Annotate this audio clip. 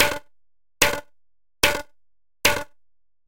synth snare
snare, synthetic